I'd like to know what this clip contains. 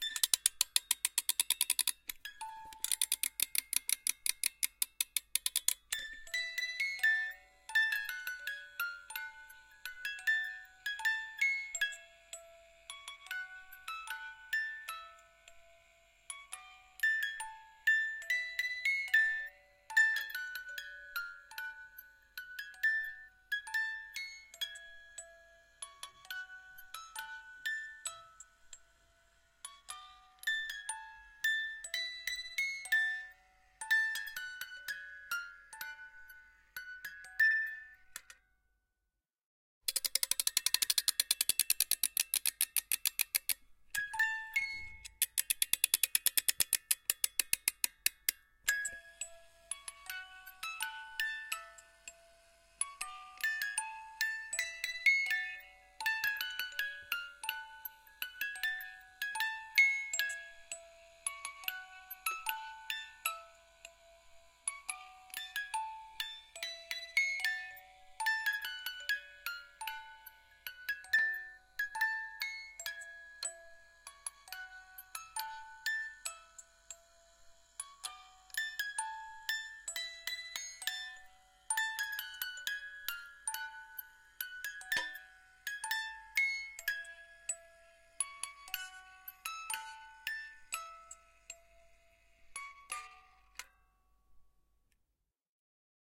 small MusicBox
Recorded Zoom H4n
antique, musicbox, tune, toy, mechanism